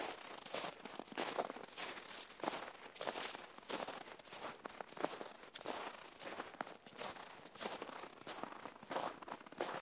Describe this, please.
I recorded sound of walking in the snow in the forest.
walking, forest, snow